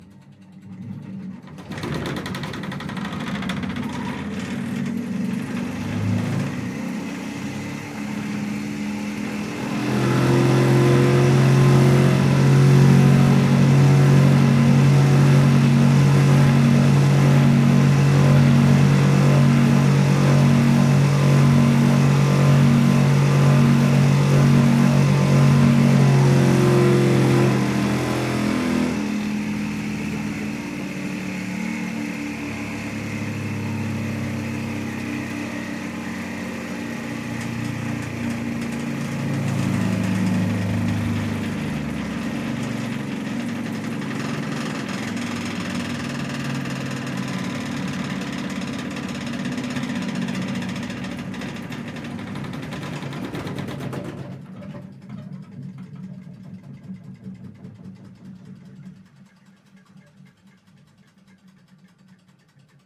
Old soviet washing machine "Сибирь-2" ("Siberia-2") whiring. Short version. Time ofthe wringing controlled by mechanical timer. This is short action, but user can set timer to 3 minutes maximum.